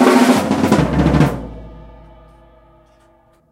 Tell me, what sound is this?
silly drum break 2
Silly drum break recorded in my attic. Part 2
toms, tom, break, drums, drum, silly, snaredrum